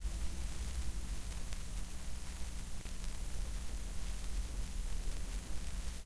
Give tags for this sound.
album; crackle; lofi; LP; noise; noisy; pop; record; surface-noise; turntable; vintage; vinyl; vinyl-record

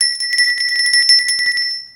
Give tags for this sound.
ding; bell; ring; ringing; jingle; brass; ting

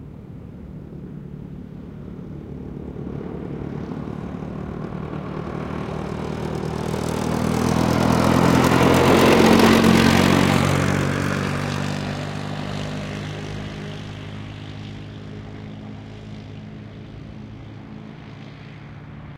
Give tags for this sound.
street; motorway; van; driving; traffic; autobahn; traffic-noise; doppler-effect; road; doppler; lorry; vehicles; highway; truck; passing

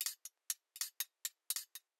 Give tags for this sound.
spoons; rhythm; percussion; loop

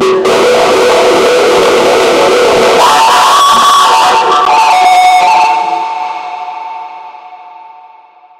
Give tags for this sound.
annoying; bass; distorsion; eletro; feedback; film; hard; heavy; horror; illbient; score; scream; soundtrack; spooky; terror

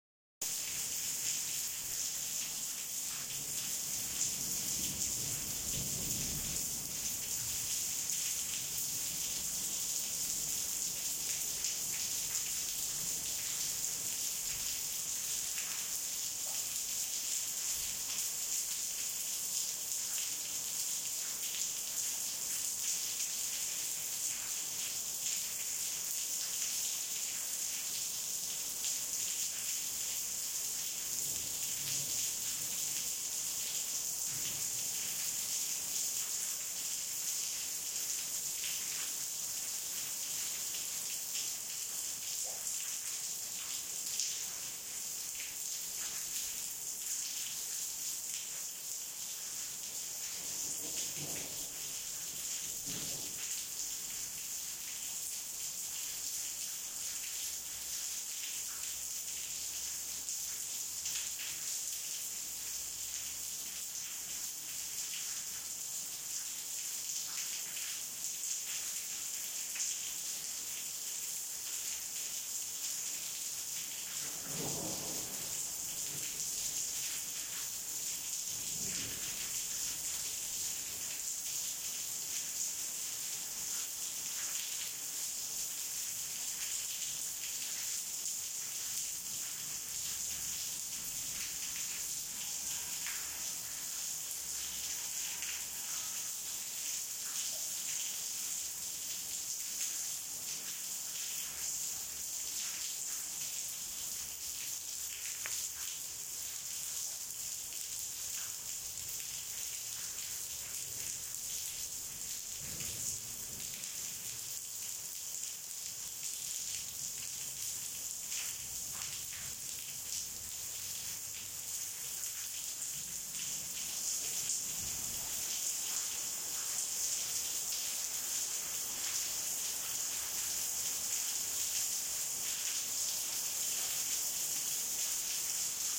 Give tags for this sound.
rainstorm; storm; rain; thunder

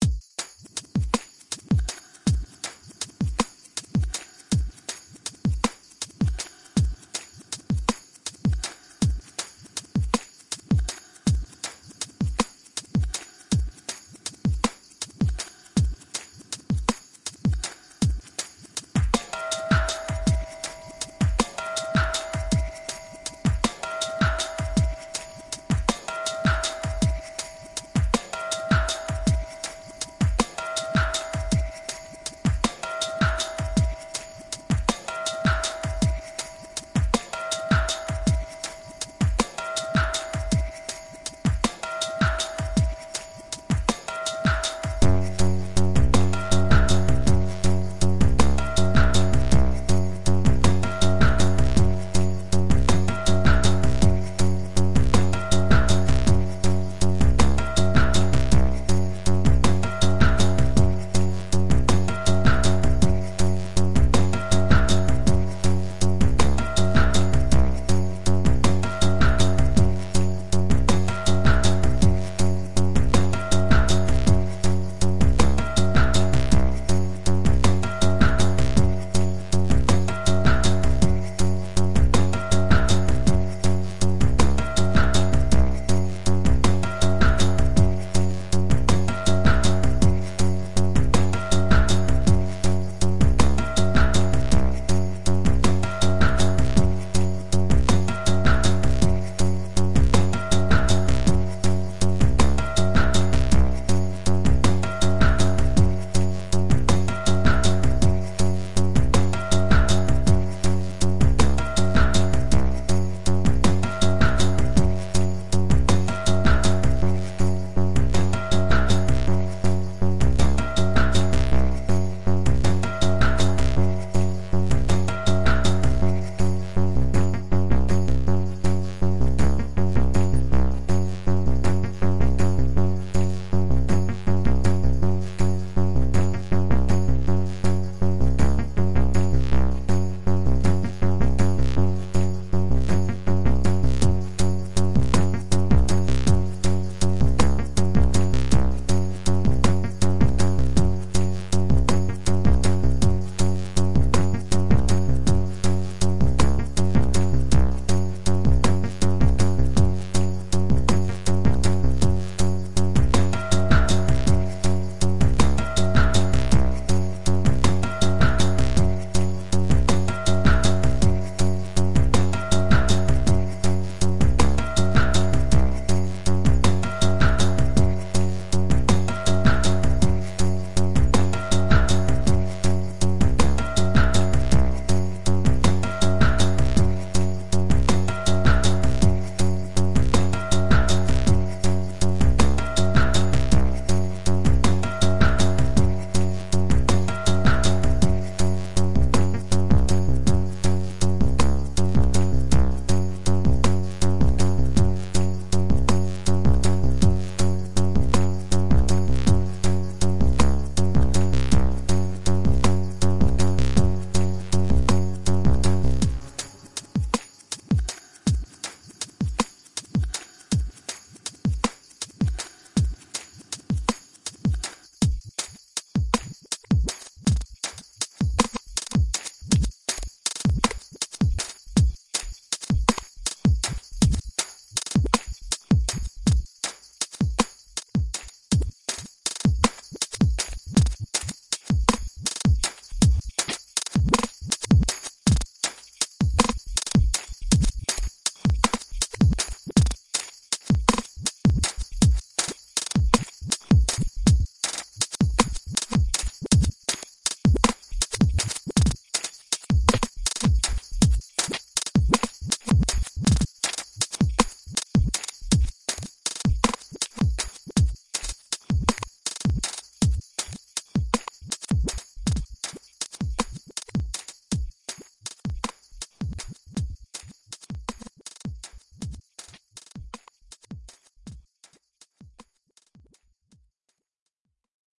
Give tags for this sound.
ambient; computing; digital; fantastic; fiction; fight; future; music; sci-fi; soundesign; soundtrack; synth; synthesizer

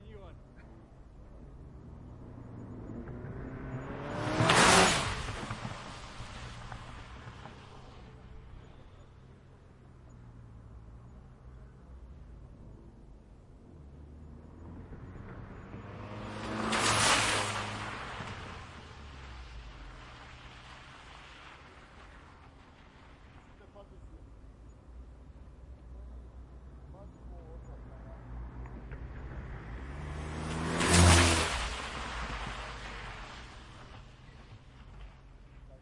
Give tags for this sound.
car; driving; golf; gti; vw; water

